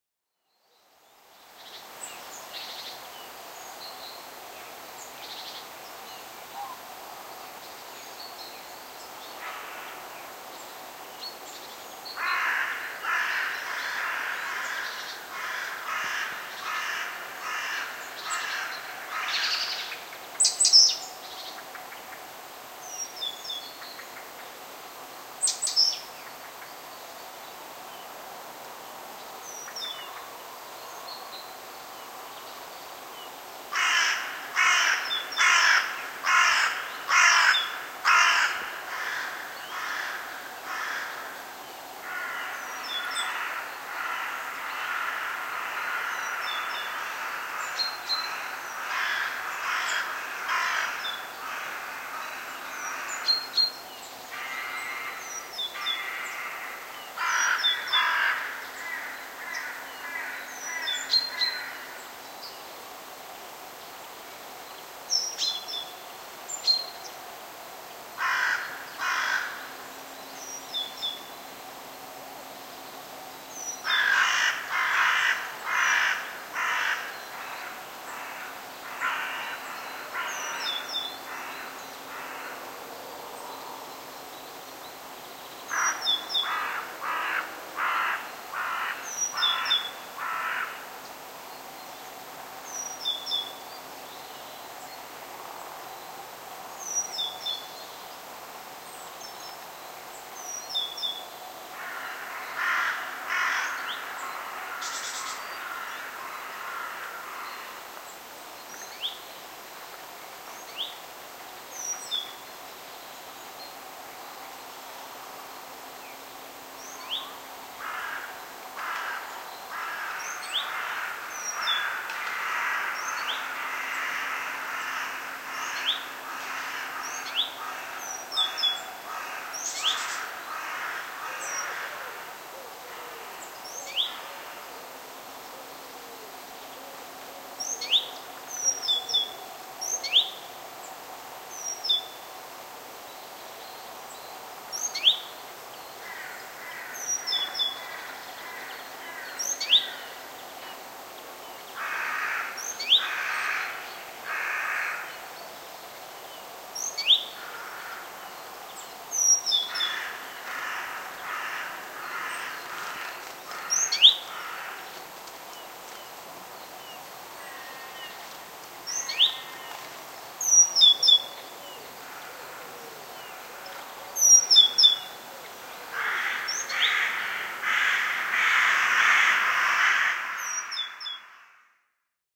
Field recording from a forest area at Røsnæs in Denmark. Recorded February 2008. Forest surrounding, birds and crows singing, a pigeon and a single woodpecker appears, wing flutters. Distant cars, a distant helicopter and general distant rumble.
Recorded with Zoom H2 build in microphones.